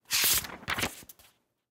Book page change 1 1
Some foleys for you :)
page, turn, flip, paper, movement, change, sheet, book